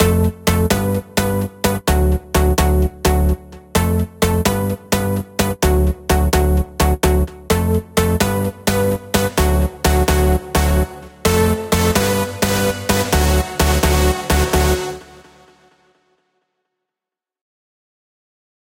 Lead Synth 128BPM F
Dirty electro house lead synth (128 BPM) F
Dirty, electro, F, house, lead, synth